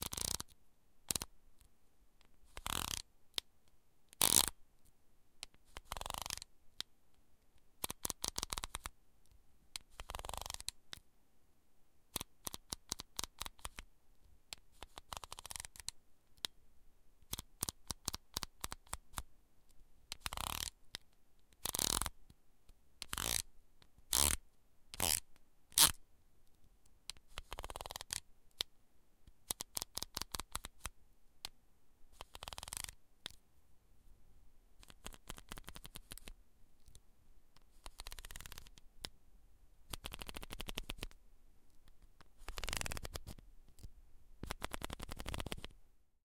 pentax me - f-stop
Adjusting the f-stop of a Pentax ME SLR camera.
camera, camera-click, click, f-stop, manual, pentax, pentax-me, photo, photography, picture, shutter, slr-camera, taking-picture